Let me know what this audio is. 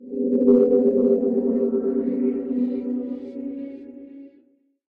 A ghost chant. Made with Audacity